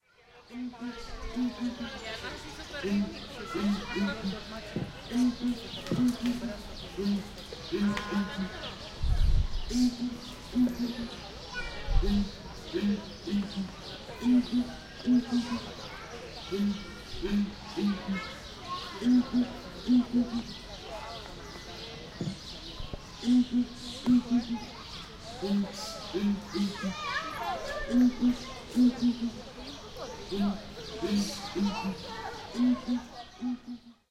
Calao Terrestre 03
Song of two males of Southern Ground Hornbill (Calao terrrestre, scientific name: Bucorvus leadbeateri), and ambient sounds of the zoo.
Spain, Barcelona, Calao, animals, birds